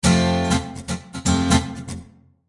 Rhythmguitar Fmaj P111
Pure rhythmguitar acid-loop at 120 BPM
120-bpm, acid, guitar, loop, rhythm, rhythmguitar